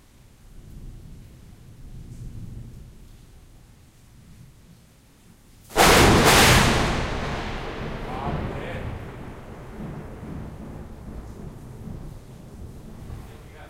scary thunder and lightning
Recorded using a Zoom r 16 and a Shure Sm 81 mic, The only problem with the sample is me saying Baap Re(which means O My God) at the end, which fortunately can be edited out, The Sample can also pass as a GunShot :)